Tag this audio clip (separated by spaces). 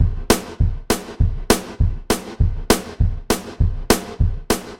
snaredrum bassdrum drums drumloop drum-loop 120bpm